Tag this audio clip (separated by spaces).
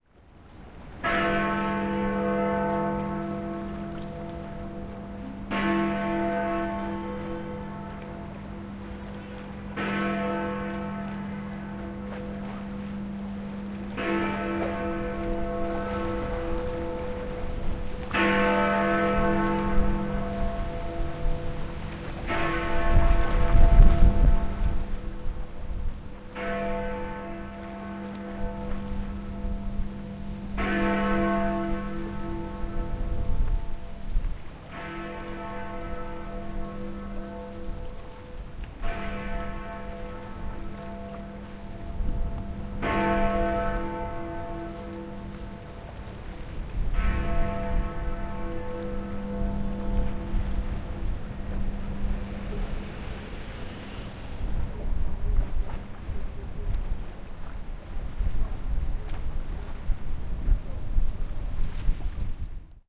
Big-Ben Chimes Clock Field-Recording London Midnight Stereo